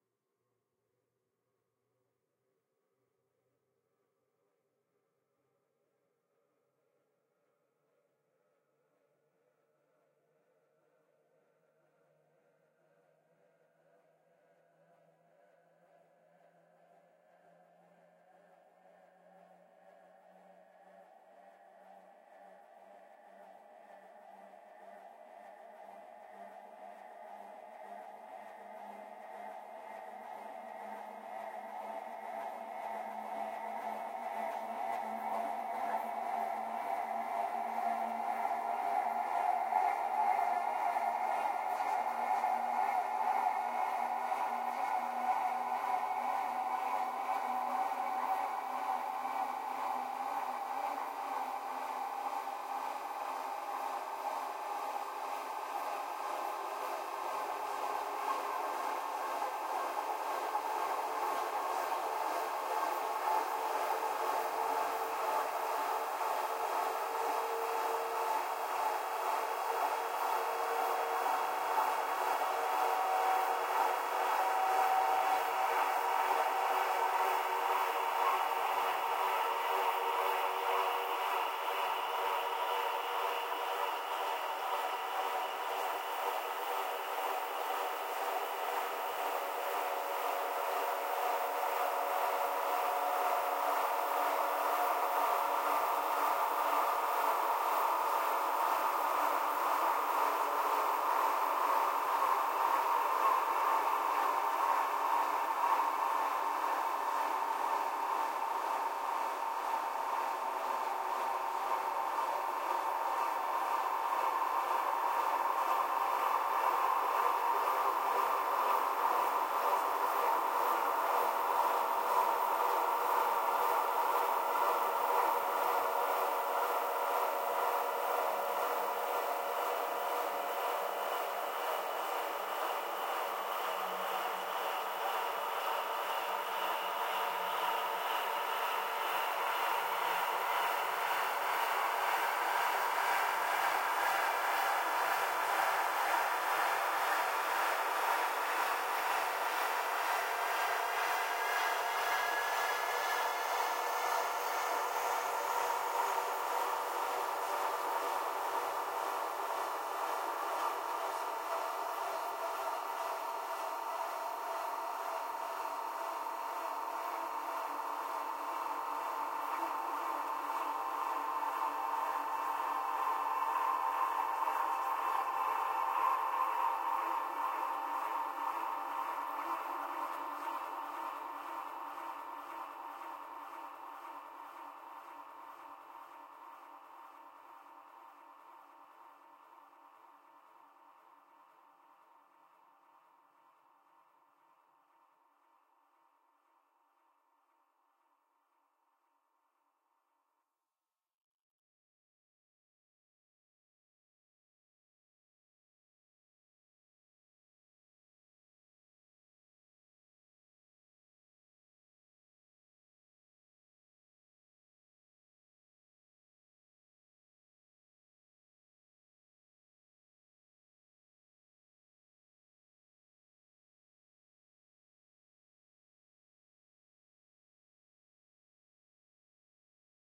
ЗАБ лонг хай
sample to the psychedelic and experimental music.
AmbientPsychedelic, ExperimentalDark, Noise